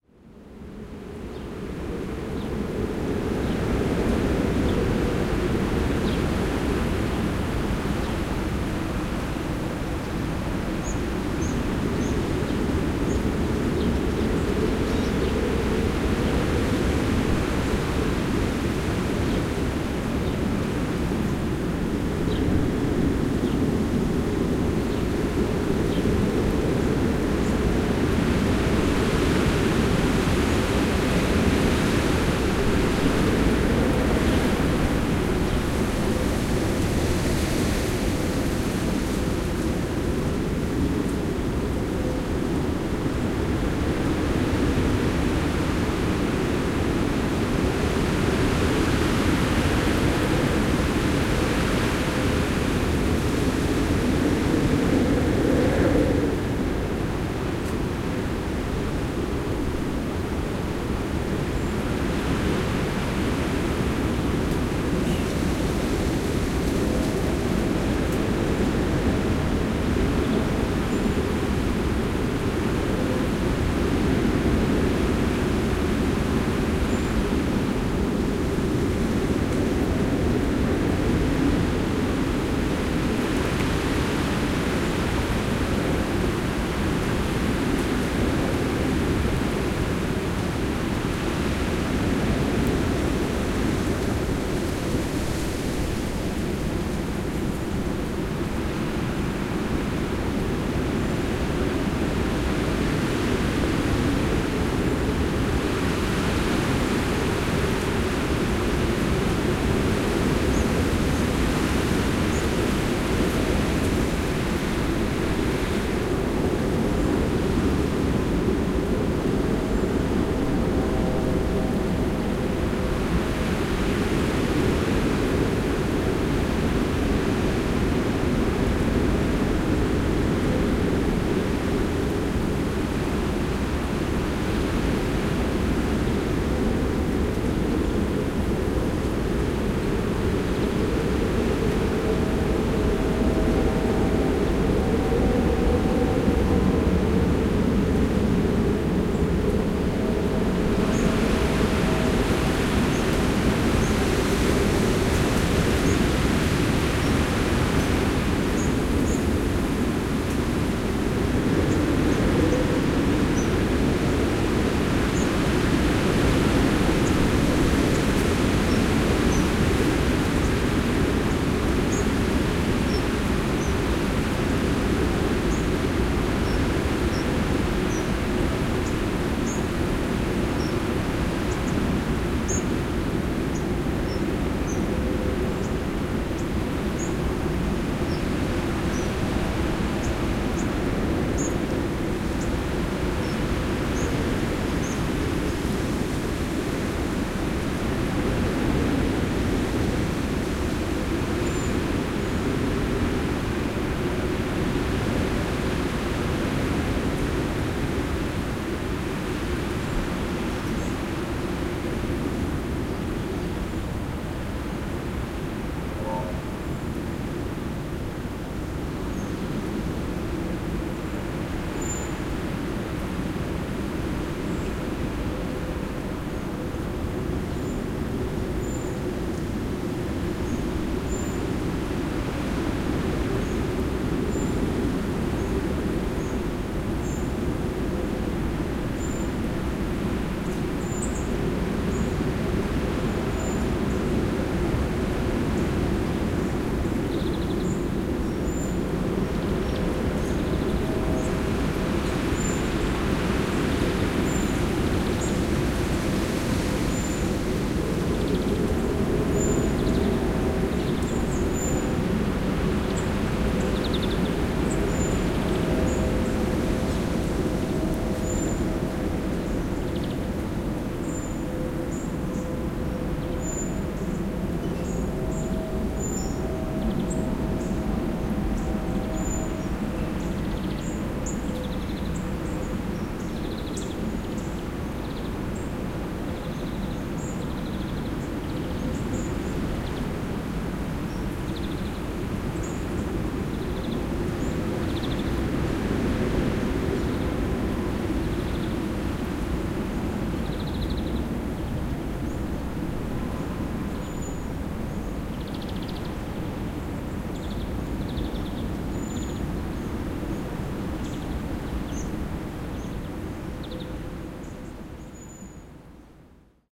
[8]castelo branco#castelo2
A storm of wind in the highest place on the Castelo Branco old town. Recorded using Zoom H4N.
Castelo-Branco, storm, field-recording, wind, birds, trees, nature